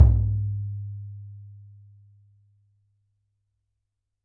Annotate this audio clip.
Frame drum oneshot RAW 03
Recording of a simple frame drum I had lying around.
Captured using a Rode NT5 microphone and a Zoom H5 recorder.
Edited in Cubase 6.5
Some of the samples turned out pretty noisy, sorry for that.
simple, oneshot, percussion, hit, drum-sample, raw, low, drumhit, world, sample, frame-drum, deep